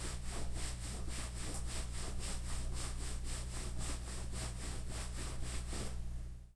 A man scratches his shorts.